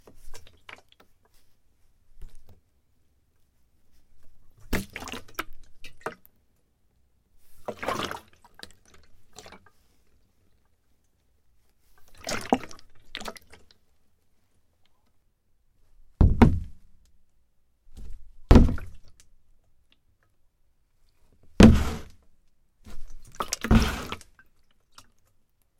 plastic gas container holding liquid slosh and put down on counter thud
container, down, counter, slosh, liquid, gas, holding, thud, plastic, put